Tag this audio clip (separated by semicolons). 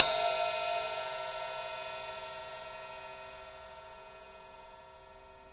ride; cymbal